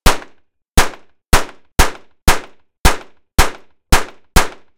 Interior recordings. Audiotechnica AT835ST, SoundDevices MixPre, E-MU 1616M.
gun, gunshot, pistol, shot